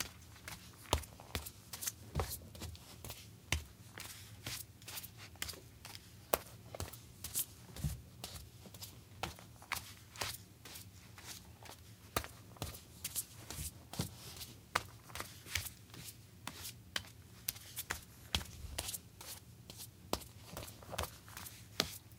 Footsteps, Solid Wood, Female Barefoot, On Toes, Medium Pace
wood, footsteps, solid, female